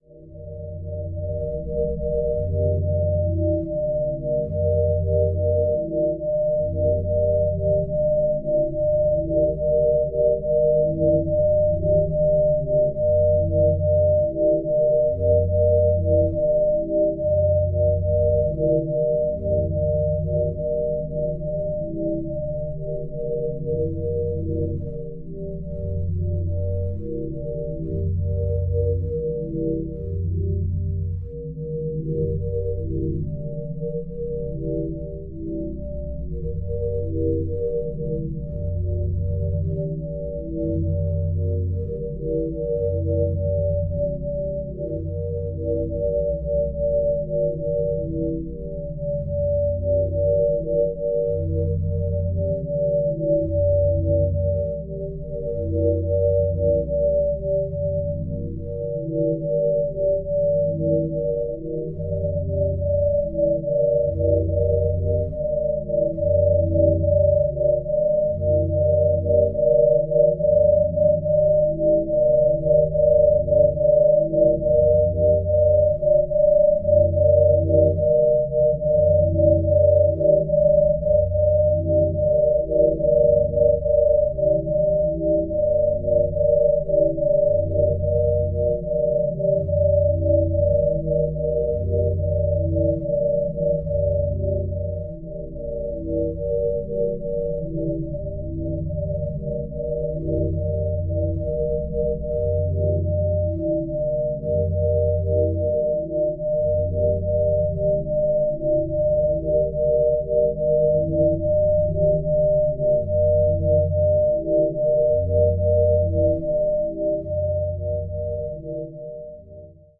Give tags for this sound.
sine-waves; drone; atmosphere; reaktor; ambient

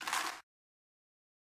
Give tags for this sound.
corn; pop-corn

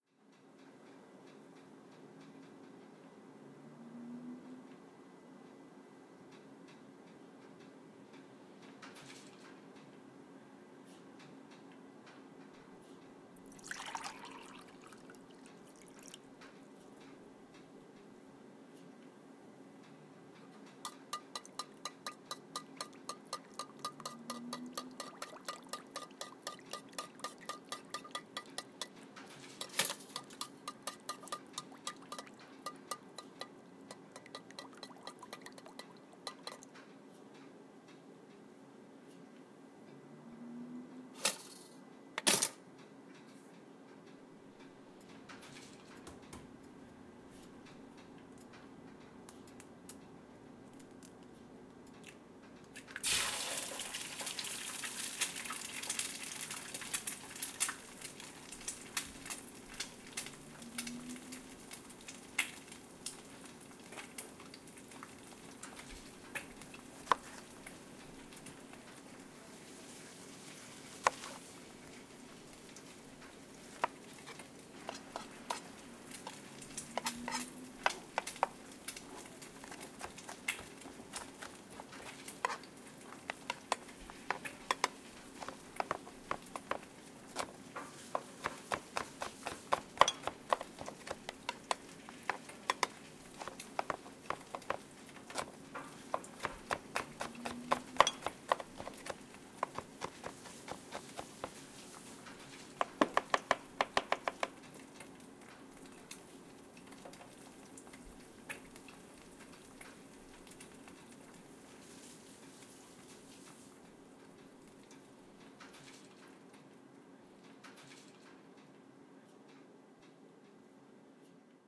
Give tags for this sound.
Chopping; Cooking; Eggs

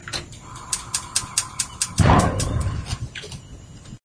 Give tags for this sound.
ignition; monster

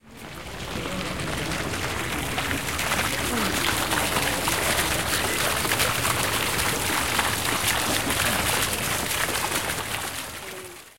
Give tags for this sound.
Water; font

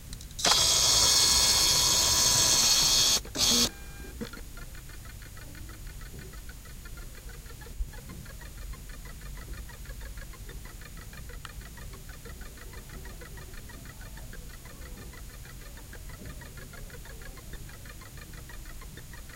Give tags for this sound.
machine; camera

